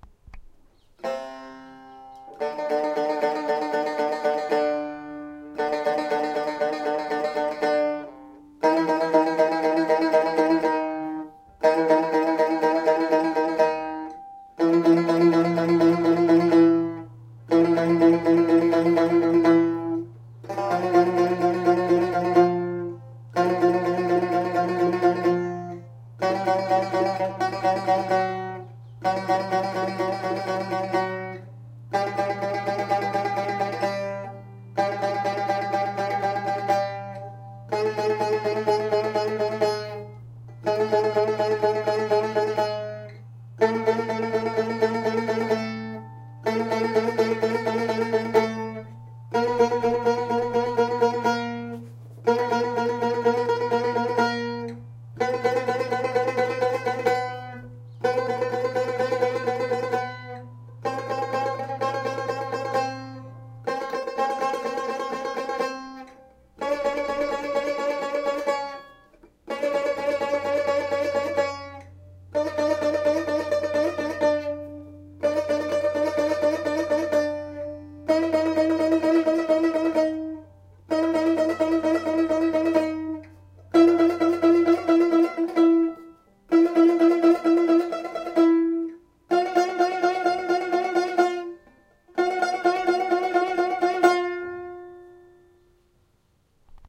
Embellishments on Tar - Left most string pair
Tar is a long-necked, waisted string instrument, important to music traditions across several countries like Iran, Afghanistan, Armenia, Georgia, Republic of Azerbaijan, Turkey and other areas near the Caucasus region. This recording features tar played by Turkish musician Emre Eryılmaz.
He shows a common embellishment played in tar. With each stroke he bends/unbends the string such that the two consecutive pitches are a semitone distant. The stroke and the bending/unbending occurs simultaneously hence the pitch changes gradually rather than discretely. In this recording, Emre plays the left most string pair.
music, embellishments, tar, compmusic, turkey, makam